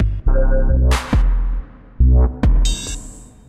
Dub (138 BPM-FIVE23 80111)
glitch, fill, table-effects, broken-step, dub